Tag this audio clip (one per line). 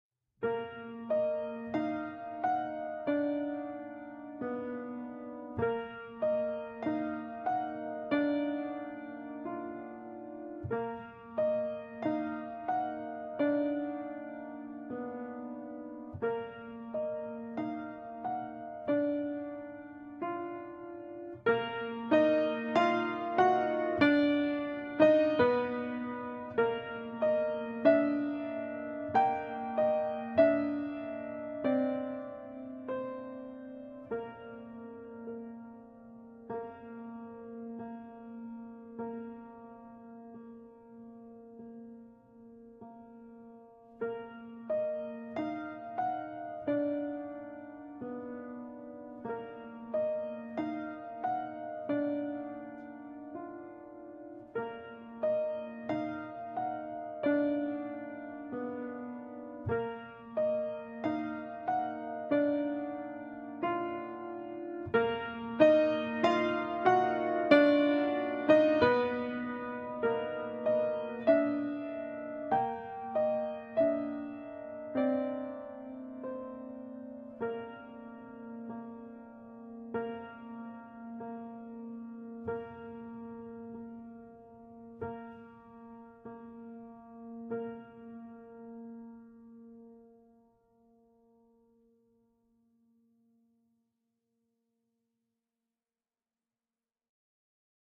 sinister
strange
noir
creepy
fear
eerie
scary
nightmare
piano
horror
spooky
drama
haunter